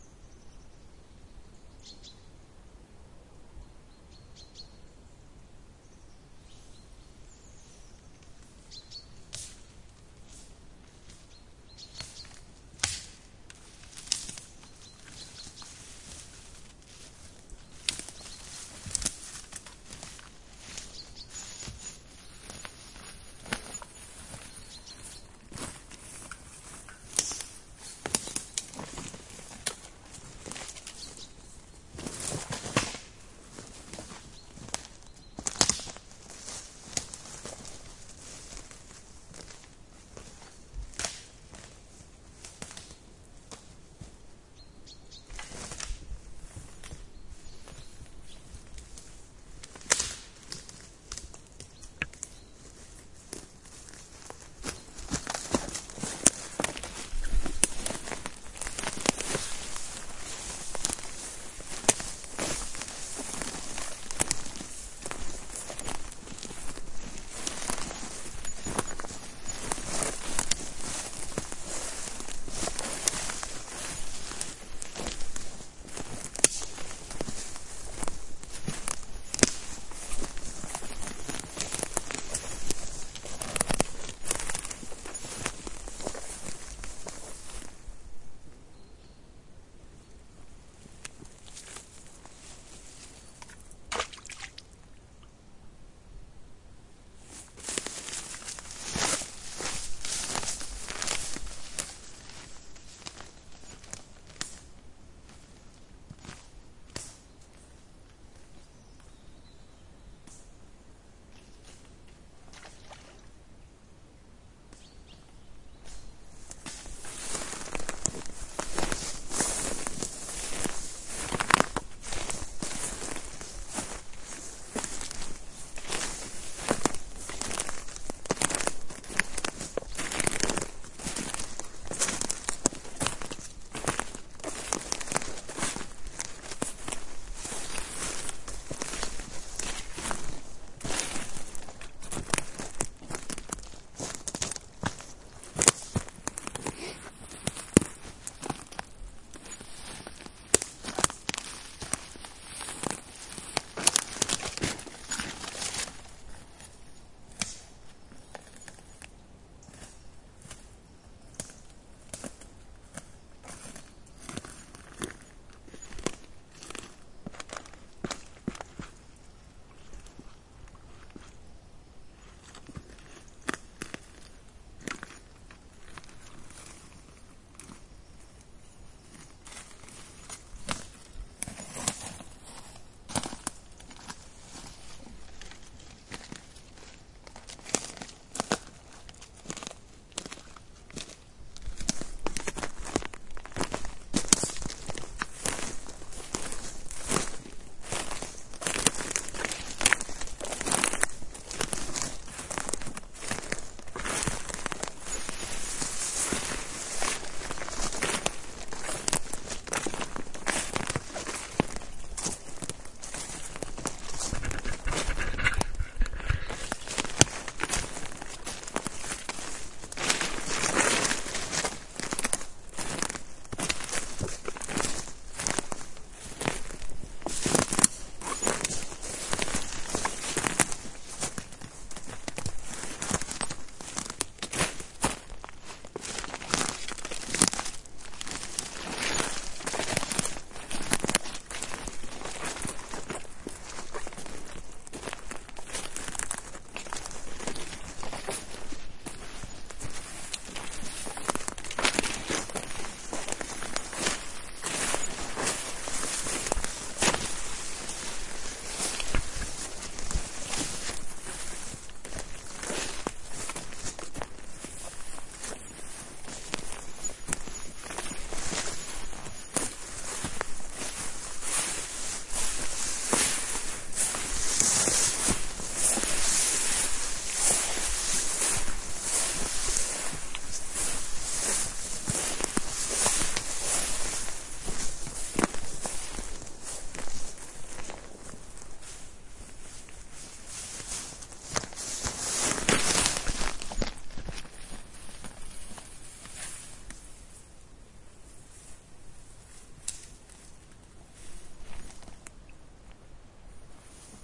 A short walk in the forest, by a little creek.
finland, forest, walk, creek, birds